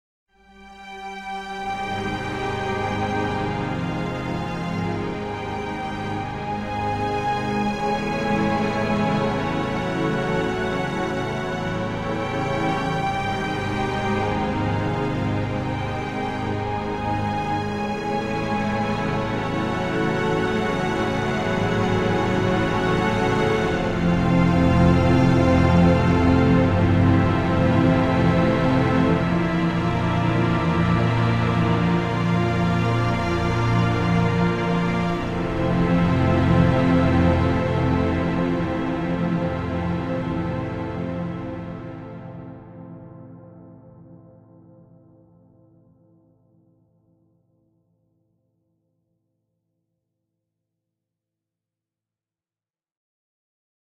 Orchestral Strings, Warm, A
Messing around with some sample libraries, I fell in love with this string sound and created a warm, musical phrase that is slow and calm, almost dream-like.
The progression is:
A-flat Major 7th
A Major 7th
A-flat Major 7th
A Major 7th
F Major 7th
B-flat Major Augmented 4th
F Major 7th
An example of how you might credit is by putting this in the description/credits:
Originally created in Cubase with the EastWest sample libraries on 18th September 2017.